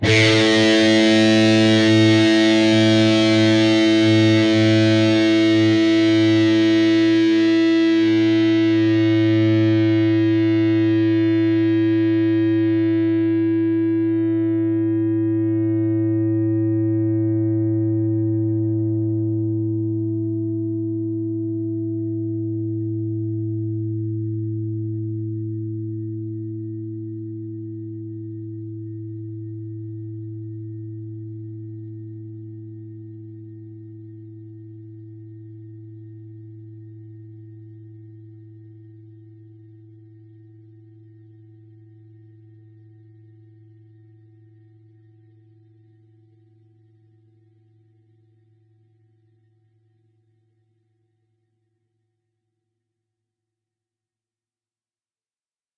Dist sng A 5th str
A (5th) string.
guitar, single-notes, guitar-notes, distorted, distorted-guitar, strings, distortion, single